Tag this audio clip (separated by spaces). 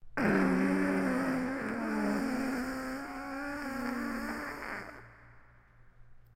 Creature Growl Horror Monster Scary Zombie